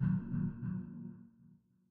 Pan Drum
A drum sound with panning and delay
delay, drum, panning